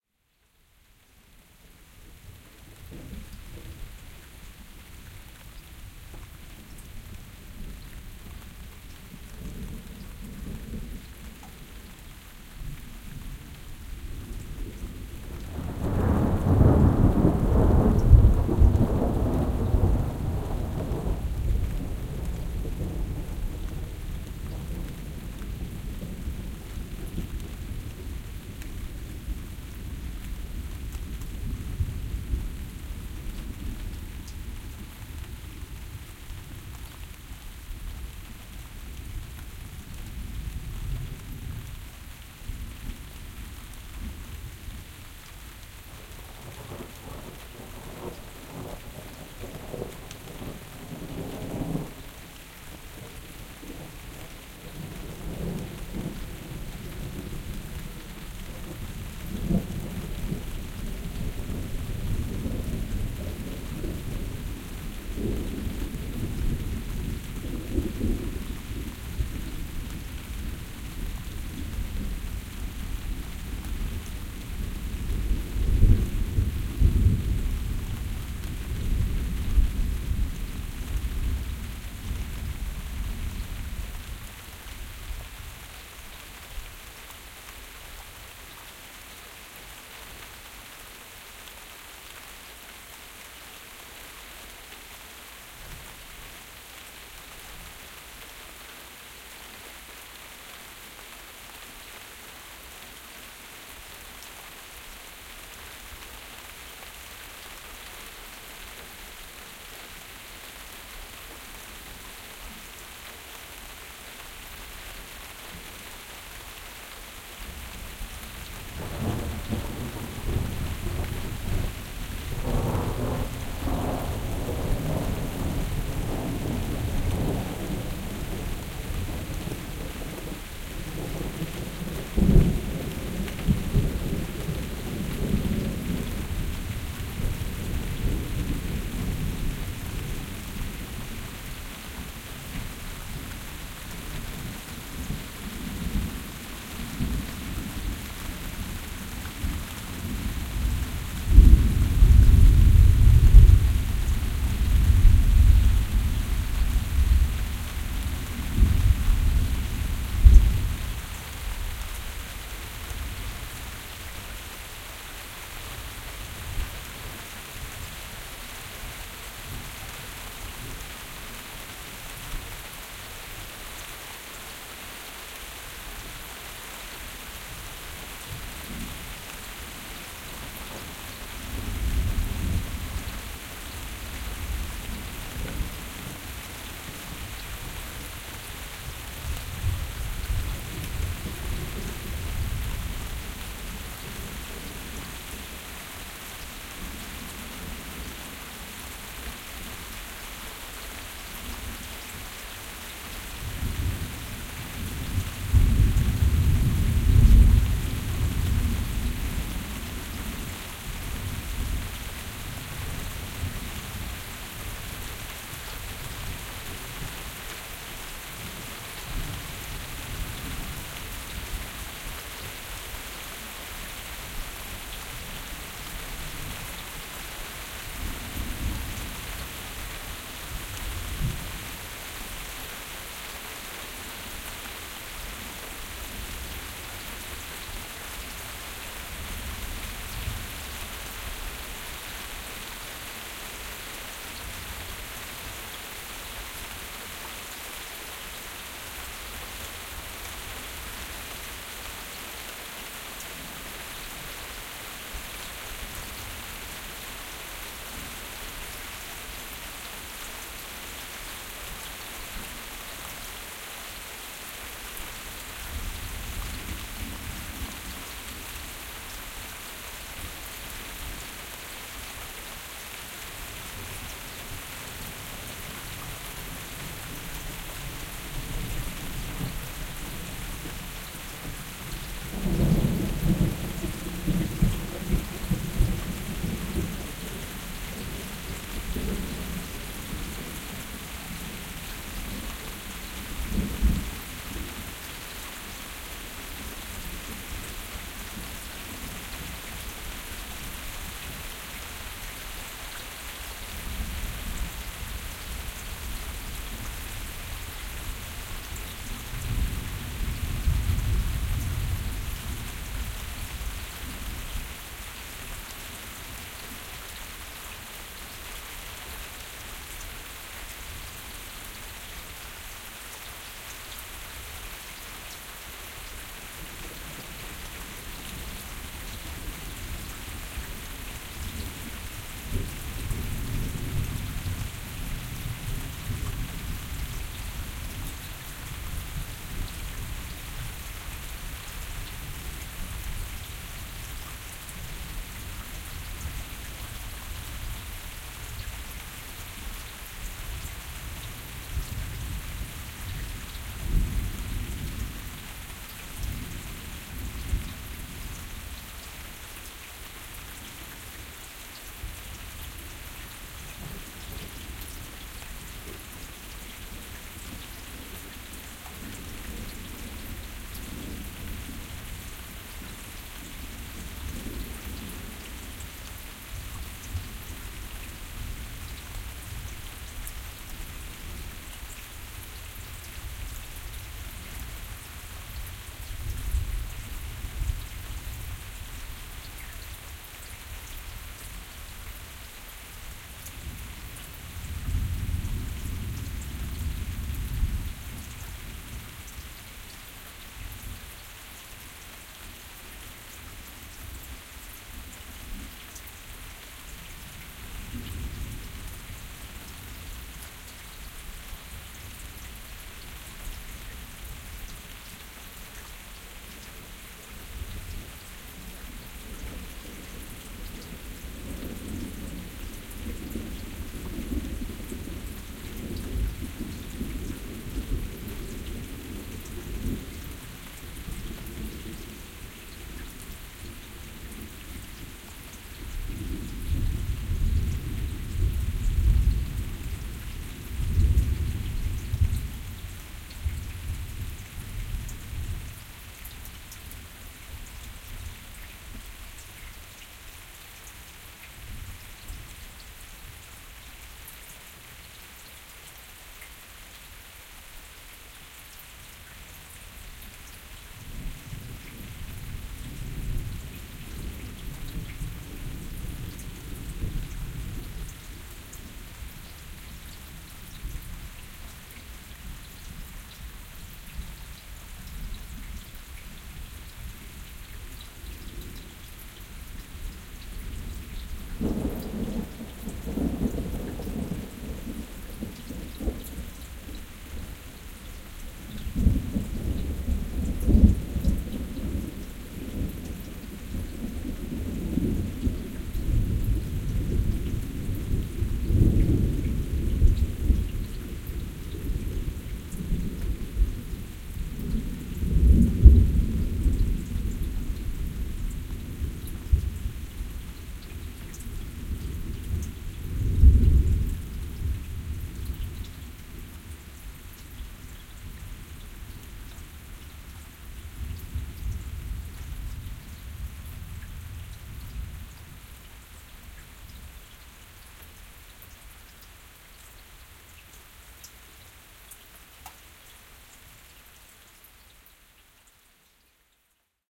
Ambeo binaural, Summer rains and thunderstorm
Summer rains and thunderstorm recorded on an iPhone SE with the Sennehiser Ambeo in-ear binaural microphones. Levels normalized to 0dB.
Ambeo, field-recording, rain, Sennheiser, summer, thunder